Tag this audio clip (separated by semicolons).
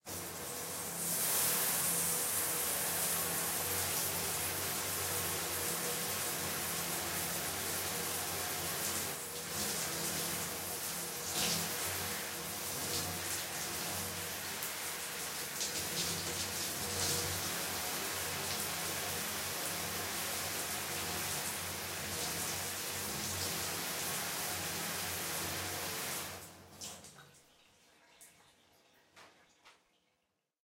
bathroom; shower; water